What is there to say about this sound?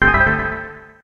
I made these sounds in the freeware midi composing studio nanostudio you should try nanostudio and i used ocenaudio for additional editing also freeware
bootup, clicks, startup, click, intro, sound, bleep, application, intros, effect, sfx, desktop, event, game, blip